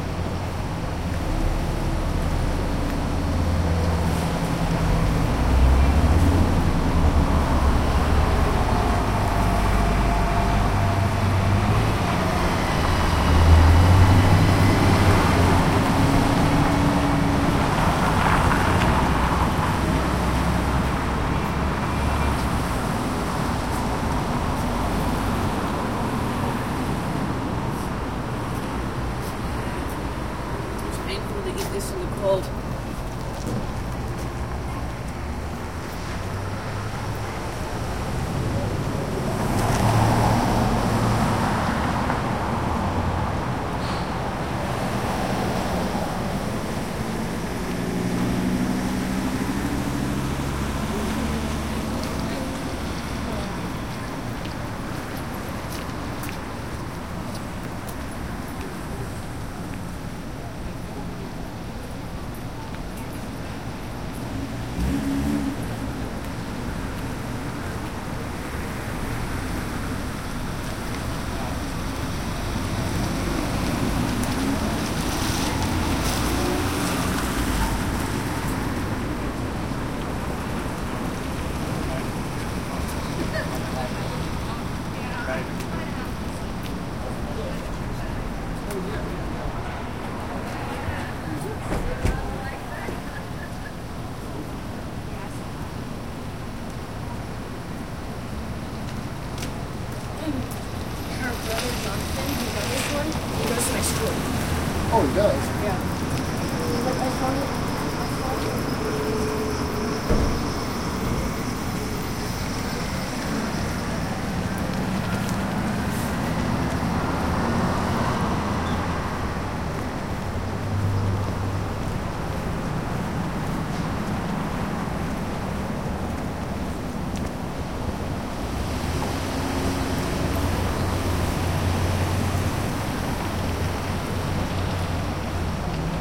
fairhaven street ambience LOOP
Recorded on the corner of 10th street and Harris Ave using a Marantz PMD620 solid state recorder
ambience, downtown, street, city